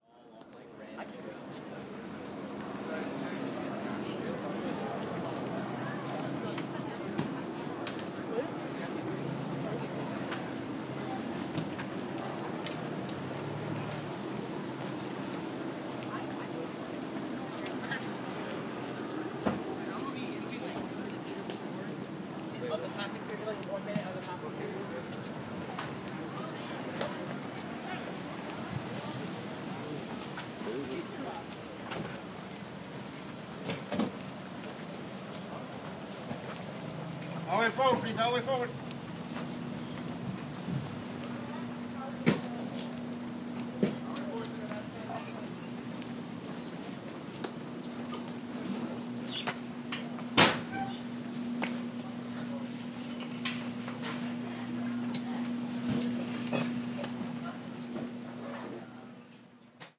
Sound Walk 3 LHS
Helicopter, Talking, Cars Braking, Footsteps, A Car Door Closing, an AC Unit, A locker door being opened and closed and a backpack being zipped up in the Soundscape of Loyola High School's Malloy Commons
A, AC, Braking, Car, Cars, Closing, Door, Footsteps, Helicopter, Talking, Unit, backpack, being, closed, locker, opened, up, zipped